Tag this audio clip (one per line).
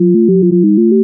dial
number
telephone